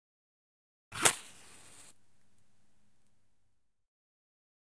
Sound of a match being ignited. Close interior recording.